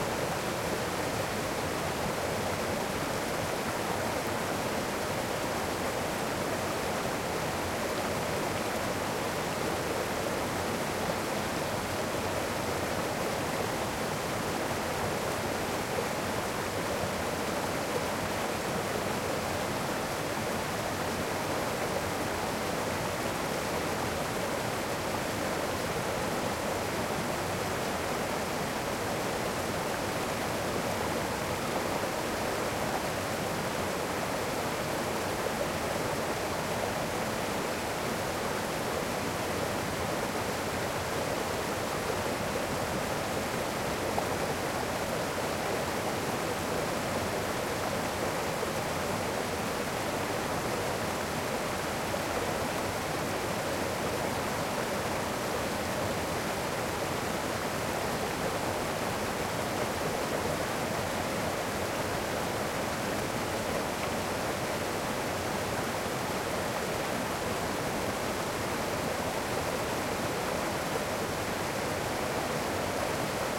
Waterfall stream close
Waterfall in the Alps. Field-recording recorded in Entlebuch, Switzerland, with a zoom h1
alpine, alps, cascade, entlebuch, europe, field-recording, flow, forest, luzern, mountain, mountains, nature, river, splash, stream, switzerland, water, waterfall, woods